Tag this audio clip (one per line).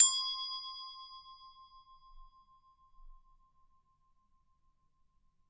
percussion
Christmas
bell